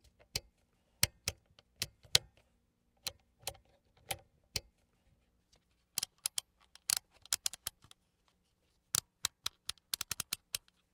Dials from the faceplate of an old Tektronix waveform / oscilloscope. Sennheiser ME66 to M Audio Delta